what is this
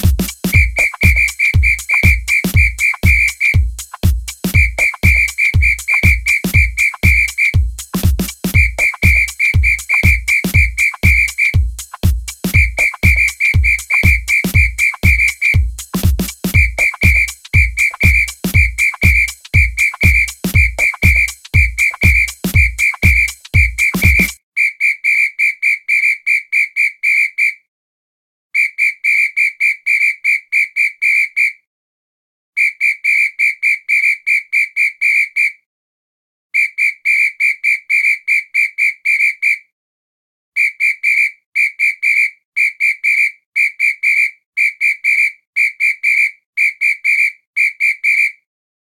Cop whistle being tweeted at a Disco (with and without music)
In dance clubs remembered people would tweet this rhythm against disco music and clap. Built this in soundtrack pro with a whistle sample so electronic sounding and tempo not perfect (haven't learned how to nudge precisely) but fine for scratch. I put it against dance beat loop for first half then drop music out for second half.